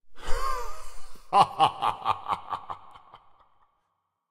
Laugh Evil 00
An evil male laughter sound to be used in horror games. Useful for setting the evil mood, or for when human characters inflicting others pain or planning something devious.